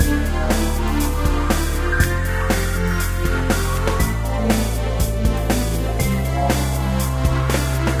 Extract from my old and unused record. Bass, drums, synth.
120 bpm, 4 bars, loops super perfectly.